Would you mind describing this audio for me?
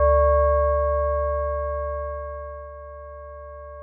Death Bell
A synthetic bell-sound made with Crystal AU.
low, bell, dark, ring, synthetic